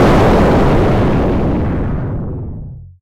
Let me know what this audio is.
An explosion handcrafted throught SoundForge's FM synth module. 4/7